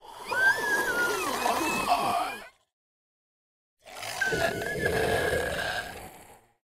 Voice Monster Rattle Mono
Sound of a Monster/Creature (Rattle x2).
Gear : Rode NTG4+
spectre, growl, ruckle, horror, rattle, growling, monster, creature, screech, fantasy, roar